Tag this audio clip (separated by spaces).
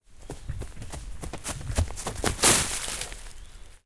branches leaf run sprint steps stop wood